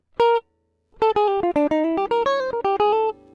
guitar be-bop 5
Improvised samples from home session..
fusion, guitar, jazzy, pattern